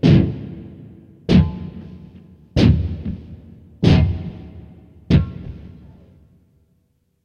Heavily-muted guitar thumps
Five short heavily-muted strikes of electric guitar strings. Unprocessed after being recorded with initial slight reverb. This sound was generated by heavily processing various Pandora PX-5 effects when played through an Epiphone Les Paul Custom and recorded directly into an Audigy 2ZS.
guitar, muted-strings